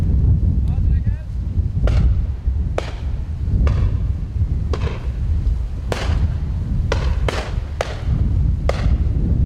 Several shots very windy conditions4
Several shots taken from a over-and-under shooter during Pheasant shoot in very windy conditions in a deep valley.
bang, discharge, fire, firing, gun, gunshot, over-and-under, pheasants, season, shoot, shooting, shot, shotgun, side-by-side, windy